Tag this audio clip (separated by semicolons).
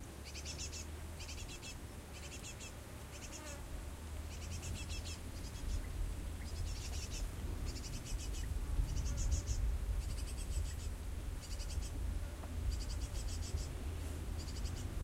birds; wind